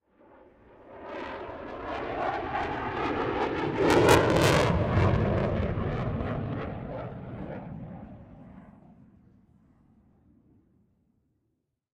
Eurofighter Typhoon Flyby 005 – Close Proximity
A recording of a flyby of a Eurofighter Typhoon – a modern jet engine fighter airplane – at an airshow in Berlin, Germany. Recorded at ILA 2022.
Aircraft, Aviation, Engine, Eurofighter, Flight, Flyby, Fuel, Jet-Engine, Manoeuvre, Roar, Stunt, Typhoon